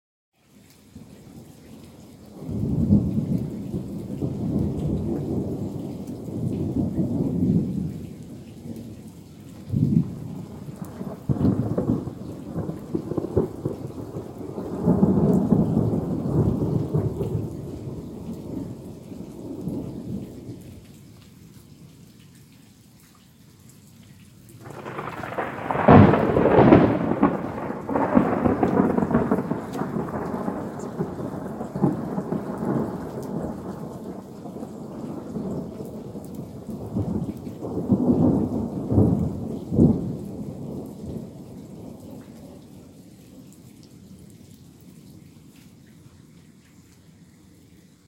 slight rain with a distance and a close thunderbolt
Recorded by myself with a samsung a3 2017 smartphone